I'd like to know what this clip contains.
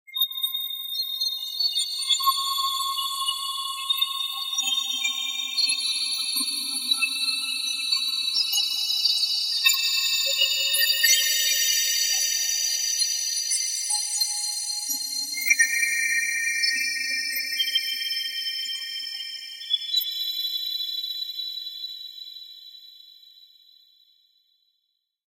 high-quality sound effect for relevant scenes in movies/videos/music, etc.
высококачественный звуковой эффект для соответствующих сцен в кино/видео/музыке и т.д.